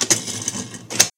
sound of a spinning ashtray. cheap home recording, no processing.
percussion, ashtray